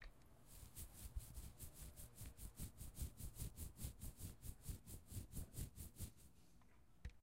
Sounds from objects that are beloved to the participant pupils at the Doctor Puigvert school, in Barcelona. The source of the sounds has to be guessed.
2014, doctor-puigvert, february, mysounds, sonsdebarcelona